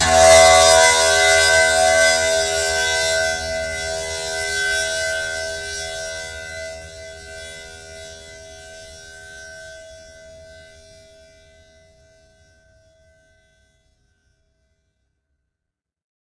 Recording of a single plucked string in E of the tamboura (indian instrument)